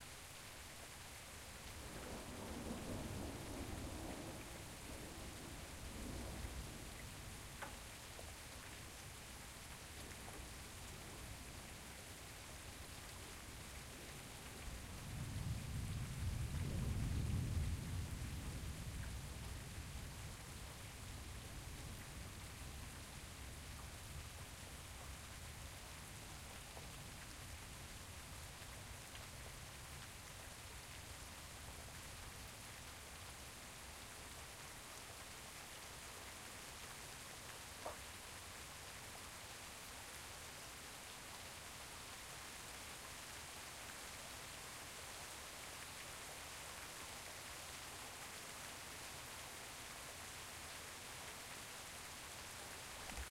A relaxing gentle rain, with very distant thunder
Brandon, MS, USA
distant, gentle, rain, raining, thunder